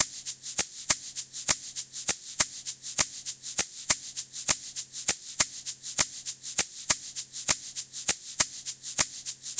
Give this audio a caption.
100bpm loop egg shaker percussion